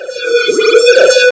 Ideal for making house music
Created with audacity and a bunch of plugins